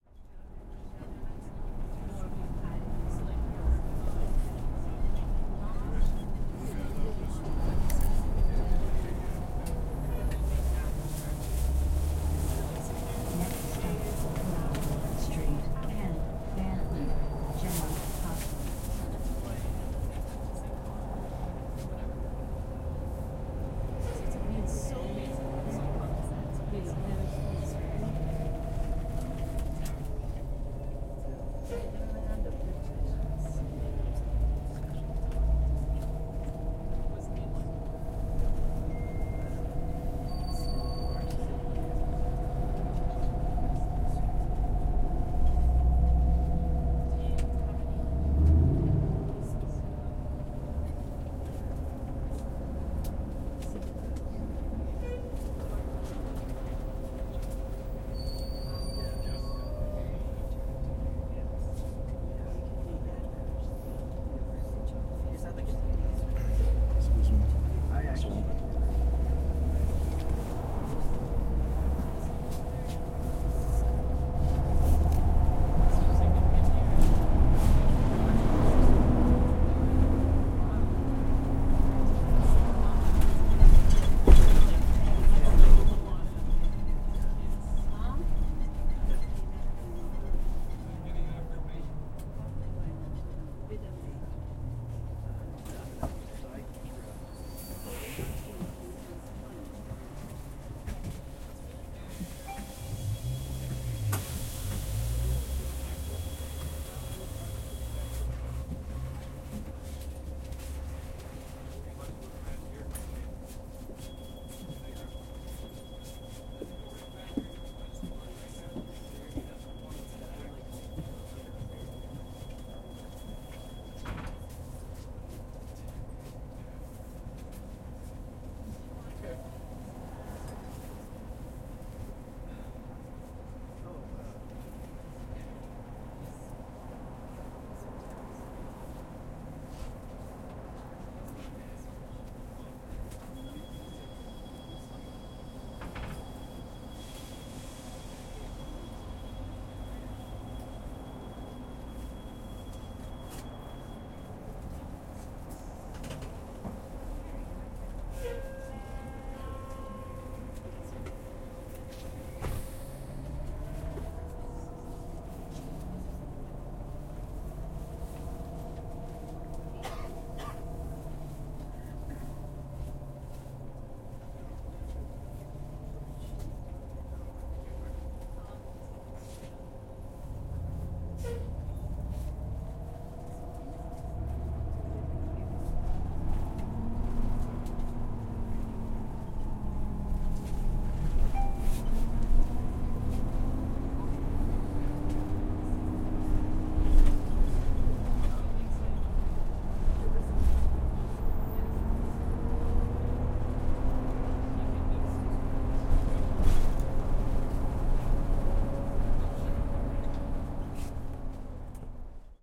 Bus inside sm
Recorded on Public Transit in downtown Vancouver on a Zoom H5.
There is some variation in levels as I was riding the levels while
recording.
bus, field-recording, public